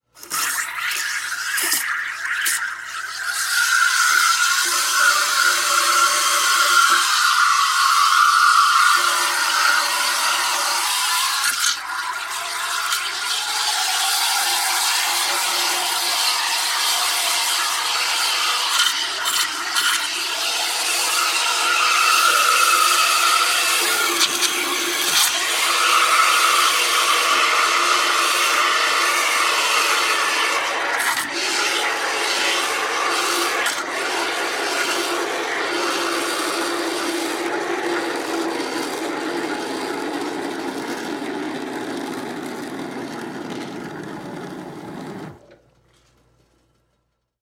Cappuccino coffee steamer throating. - 01-01
espresso machine steaming or frothing milk, (was ment for a malfunction machine in the movie)